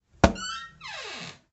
Door opening with a knock
Chirp, Door, knock, open, opening